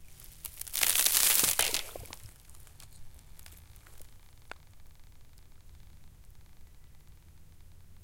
Ice Crack 1
ice, ice-crack